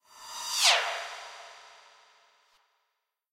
cymb shwish 30
cymbal hit processed with doppler plugin